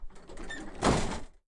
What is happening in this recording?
Sound of bus engine hood closing
close, engine-hood, door, car-hood, hit, bus, transportation